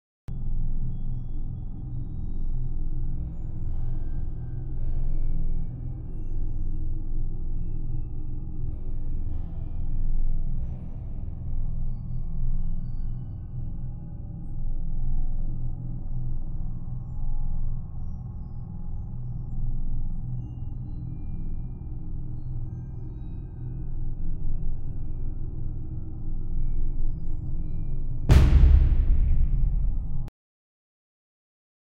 space bang Session Mixdown 1
white noise and then a simple bang at thee end.
i got sound file off here but can't remember by who, sorry
enjoy
p.s
it's a bit long so just skip most of it.
media, space, galaxy